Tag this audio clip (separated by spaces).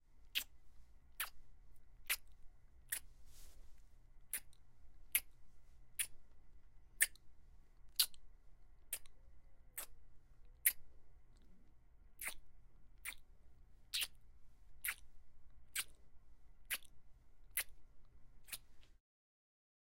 Men Kiss Woman